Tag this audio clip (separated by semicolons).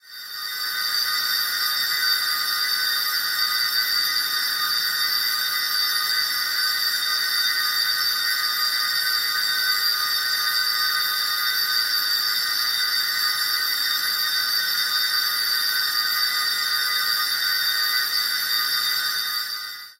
anime; astrology; astronomy; cinematic; hollywood; planets; scorpio; season; star; stars; sun; universe; water; zodiac